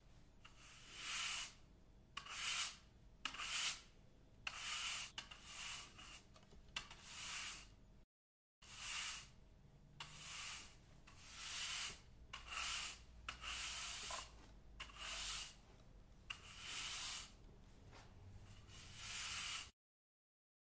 Sweeping Broom
cleaning the tiles with a broom sweeping in different speeds